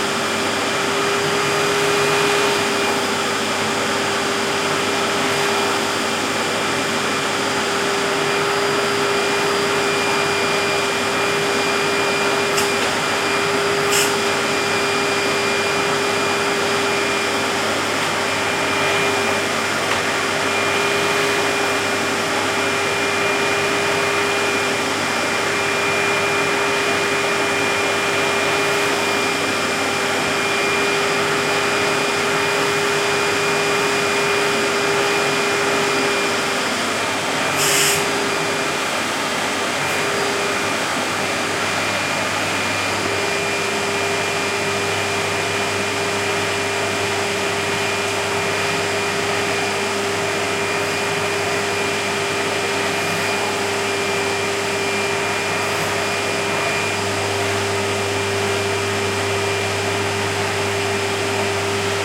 20121230 vacuum.cleaner.working.01
Panasonic vacuum cleaner working on a rug. Audiotechnica BP4025, Shure FP24 preamp, PCM-M10 recorder
rug, house-keeping, dust, filtration, suction, carpet, power